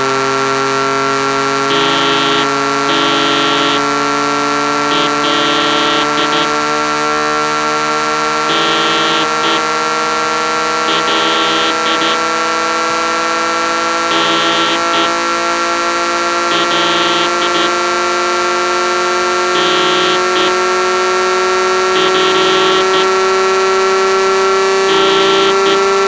small, short sounds that can be used for composing...anything